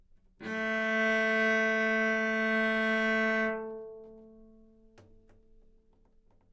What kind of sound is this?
Cello - A3 - other
Part of the Good-sounds dataset of monophonic instrumental sounds.
instrument::cello
note::A
octave::3
midi note::45
good-sounds-id::444
dynamic_level::mf
Recorded for experimental purposes
neumann-U87, single-note, cello, multisample, A3, good-sounds